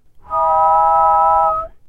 Three friends and I whistling a Major triad
Human, One-Shot, Triad, Whistling